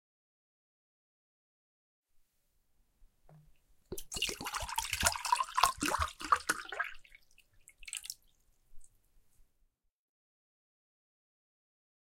2. Pouring water into a glass
Normal water being poured into a glass